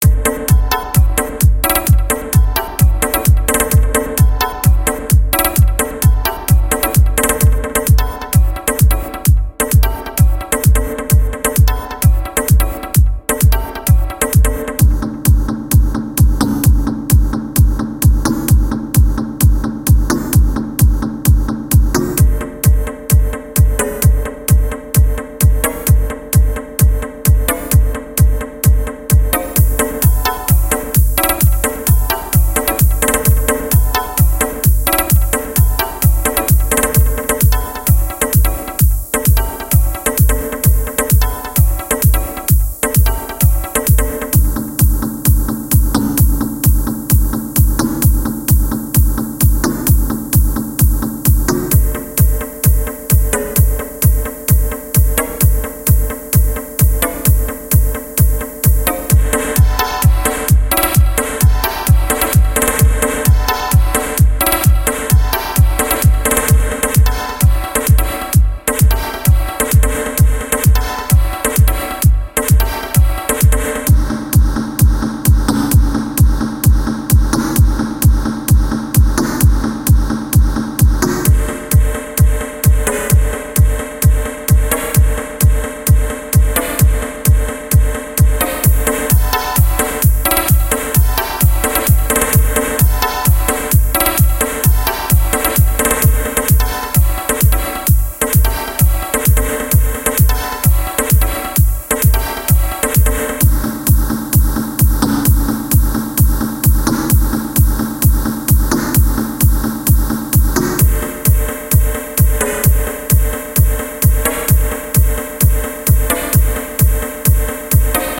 Big Room House Loop
just a big room drop loop with different variations and such.
You Don't have to, but its the most you could do :)
bounce, house